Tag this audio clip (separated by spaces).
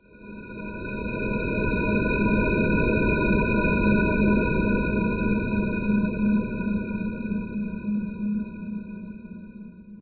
ambient breath dark